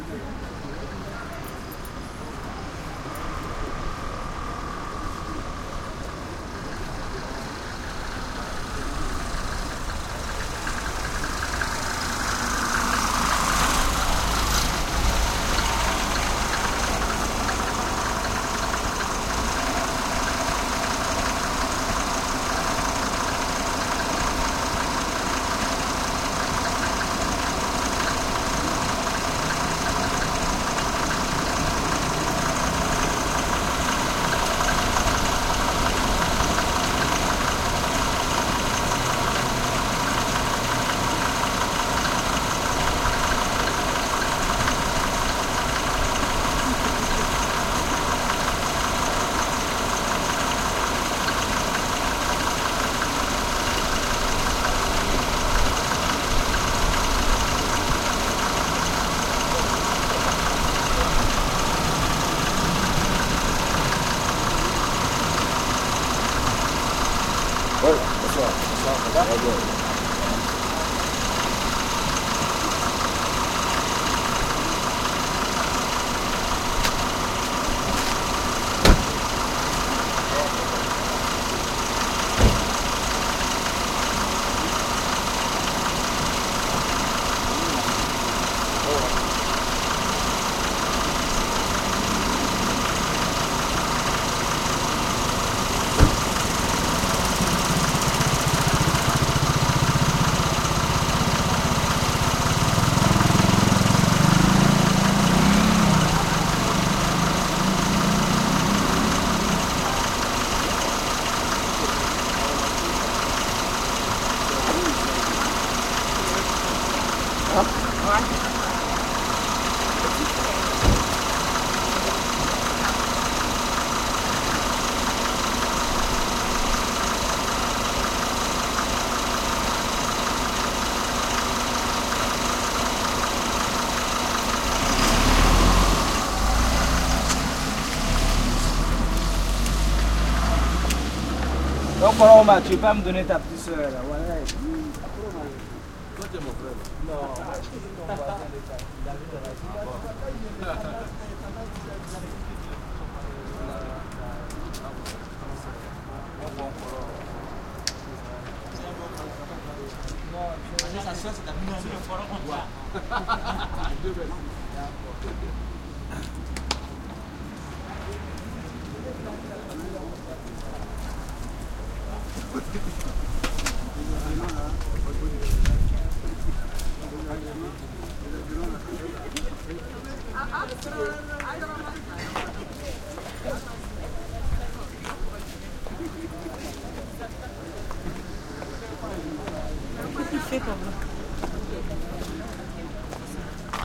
street calm +hissy throaty truck pull up and idle for a while Ouagadougou, Burkina Faso, Africa
Africa, calm, idle, street, truck